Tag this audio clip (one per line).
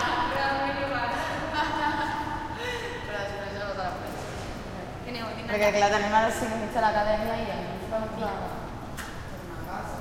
girls
UPF-CS12
campus-upf